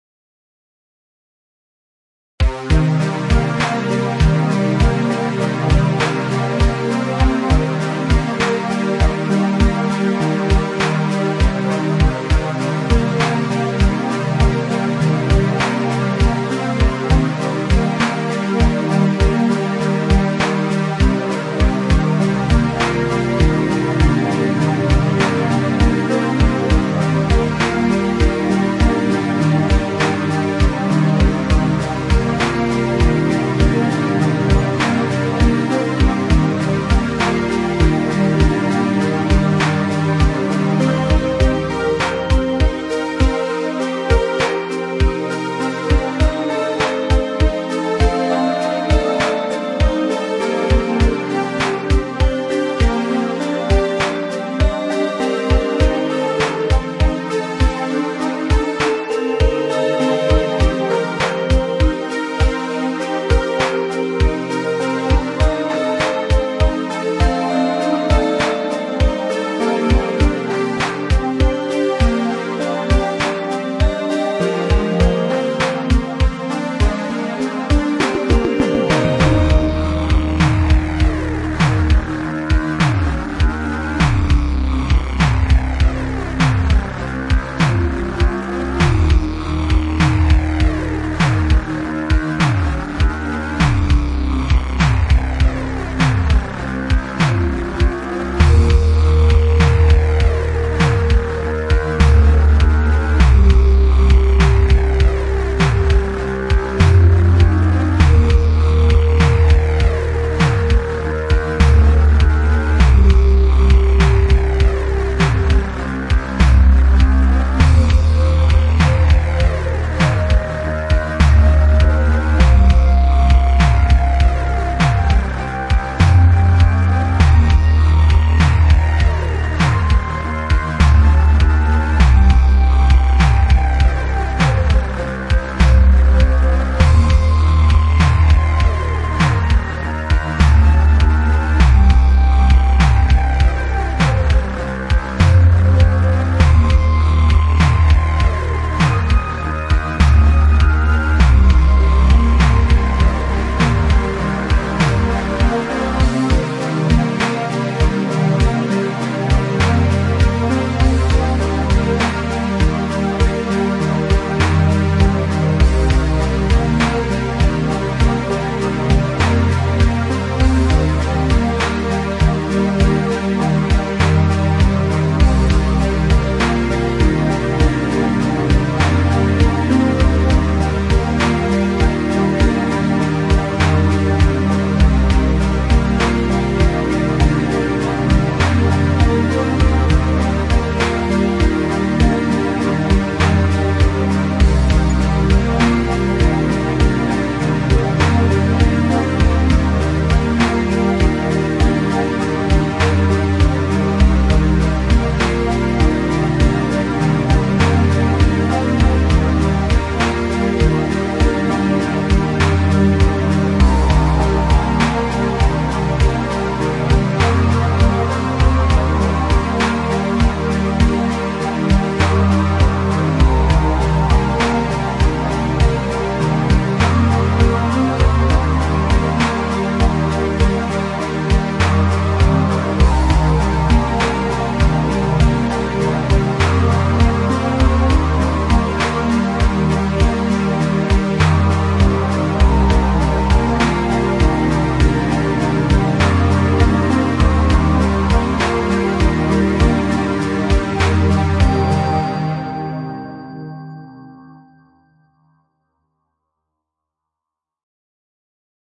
Hello and welcome!
Before creating my game, I've created music.
Unfortunately, it turned out that the created music does not match the atmosphere of the game I'm working on in any way.
If you think that the soundtracks might be useful to you, please use it!
I am 1 dev working on the game called Neither Day nor Night.
Check it out!
(And preferably a link to the Steam or Twitter if possible!)
Enjoy, and have a good day.
#NeitherDaynorNight #ndnn #gamedev #indiedev #indiegame #GameMakerStudio2 #adventure #platformer #action #puzzle #games #gaming